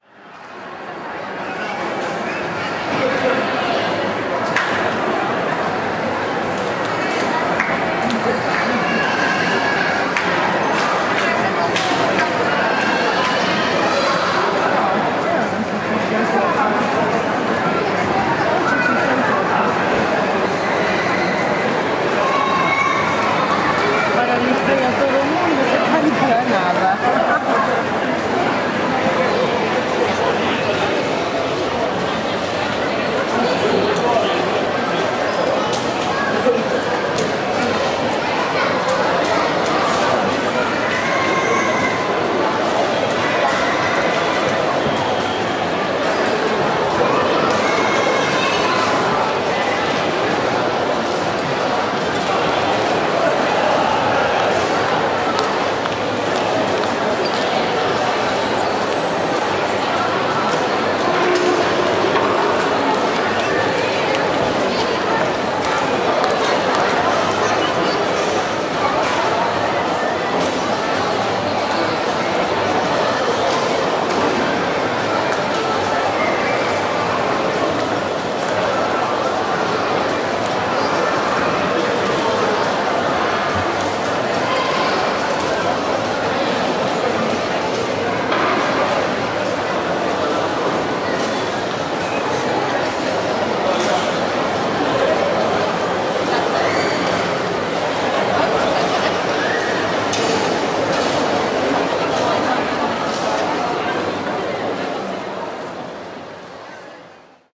Today it's rare to find a city in the world that hasn't got commercial centre. Buildings of modernity, they have their own sonic properties: large halls constructed with highly reflective materials producing a reverb with a very long decay. They sound like cathedrals of modernity, where gods in a consumerist world are mr. Money and miss shopping. Barcelona, as many others, also has one, and one of the most spectaculars. At the side of the Mediterranean: The Maremagnum.
ambient, barcelona, commercial, maremagnum, soundscape